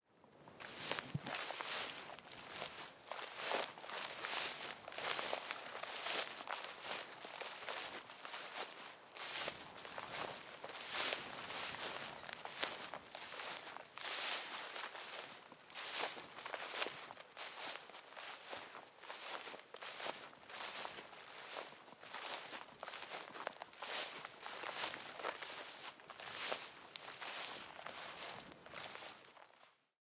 Walking in long dry grass Ver01
Walking on a gravel path. Medium pace, some birds in the bg. Recorded on a Zoom F8 with a MKH50 mic.
footsteps, gravel, walking